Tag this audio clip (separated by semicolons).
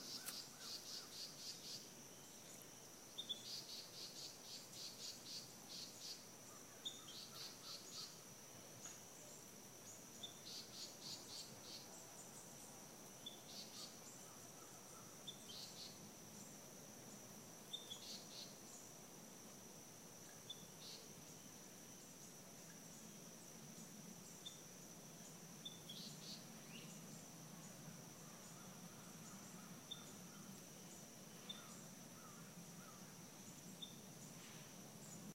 Beaufort; birds; cicadas; crickets; day; field-recording; forest; frogs; hot; humid; insect; insects; jungle; low-country; nature; night; semi-tropical; South-Carolina; summer; tropical; USA